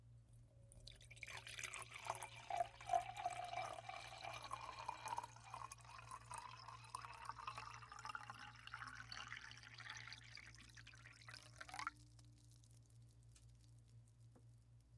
Pour into Wine Glass No Ice FF359
Continuous pour into empty glass, pouring until glass is full
empty, pour, glass